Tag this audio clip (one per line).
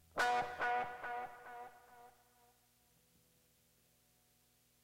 delay
dub
guitar
reggae
stab